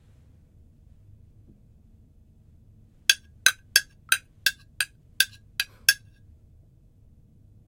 Stirring Liquid
trickling, Drink, Liquid, gurgling, shallow, gurgle, flowing, Stirring, Glass